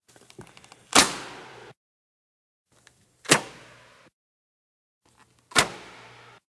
arrow and bow in one
arrow, noise, bow, swoosh